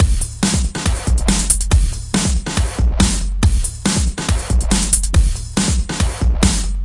140 Break loop 1
Genre: Break
Tempo: 140 BPM
Made in reason
Enjoy!